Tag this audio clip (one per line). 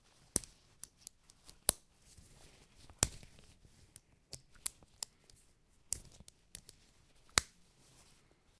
botton
click
clothing-and-accessories
snap-fasteners